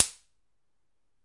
a fast finger snap recorded with DR-40
click, design, snap, sound, transient